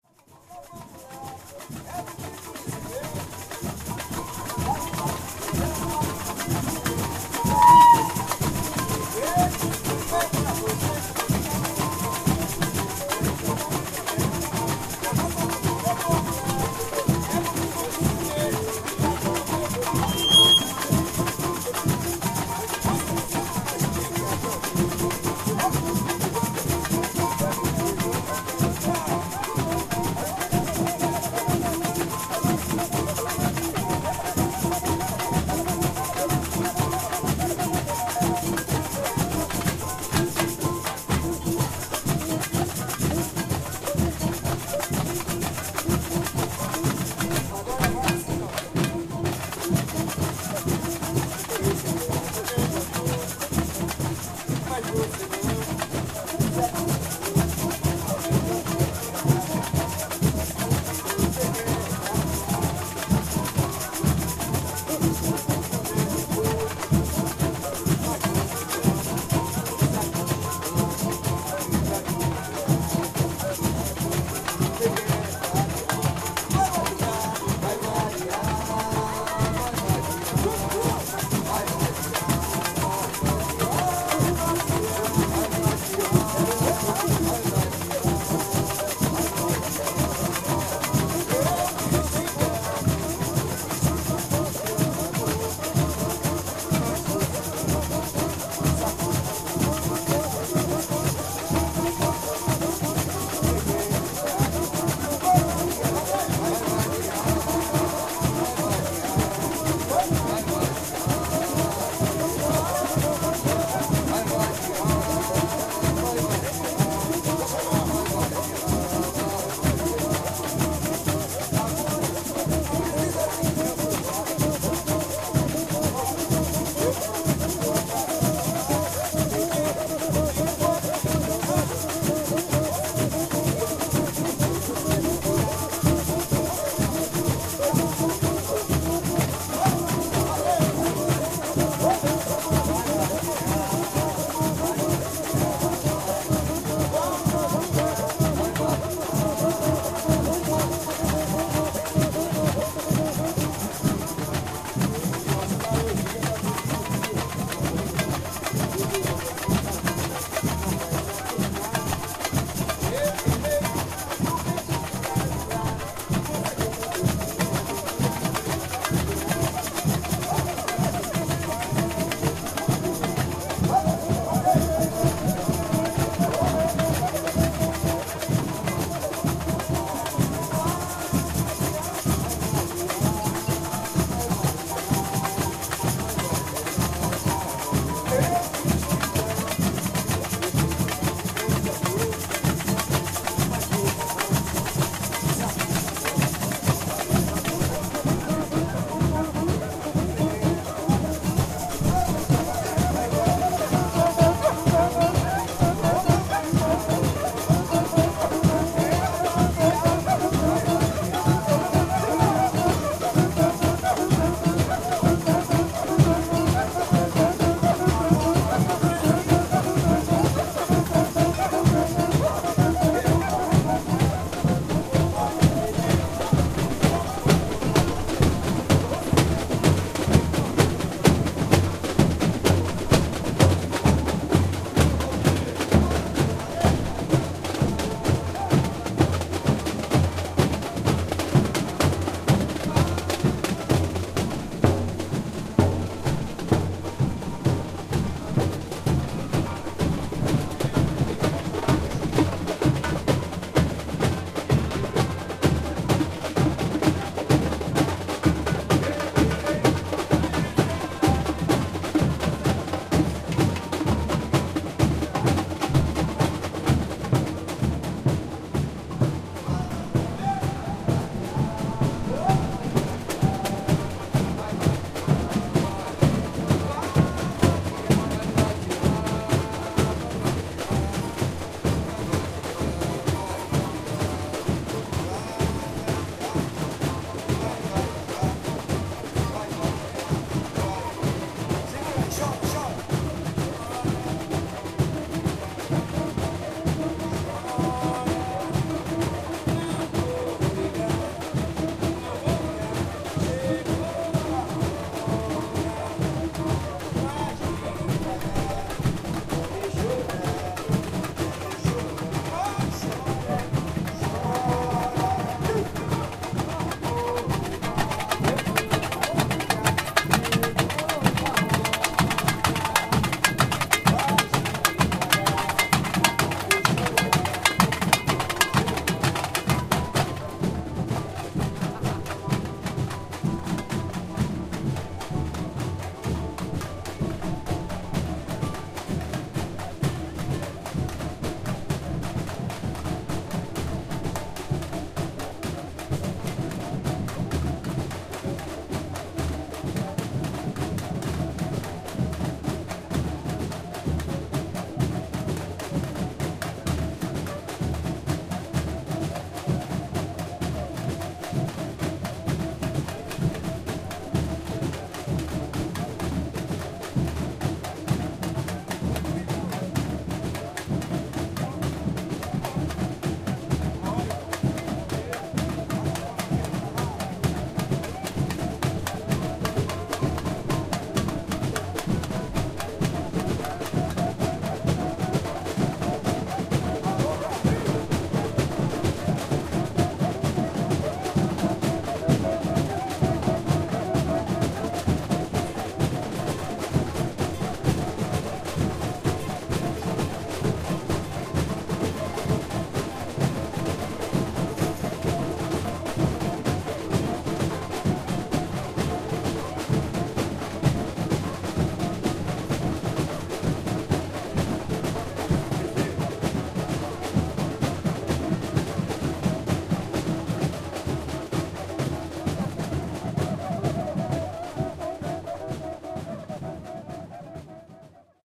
130519 Samba batucada Karneval der Kulturen Berlin
Rehearsal of a Samba School before starting the parade at the Berlin Carnival of Cultures May 2013(Karneval der Kulturen). Different drum sections of the whole bloco, cuicas, snare drums, bass drums, tamborims, shakers, cow bells. The puxador is singing a popular tune from Rio de Janeiro.Zoom H4n